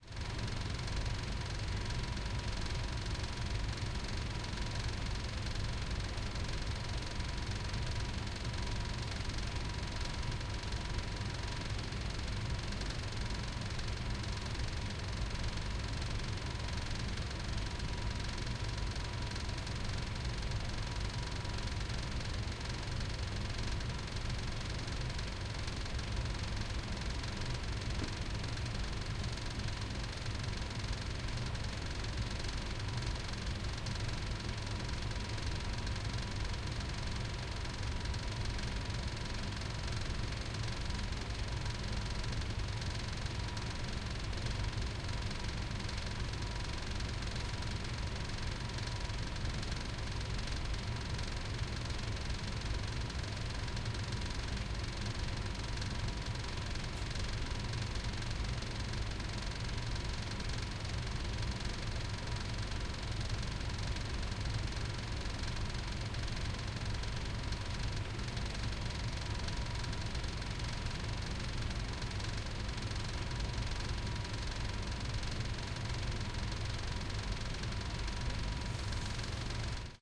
This is another recording of the rattle of the air conditioner in our office.
Taken with a Zoom F4, and a pair of DIY Electret mics.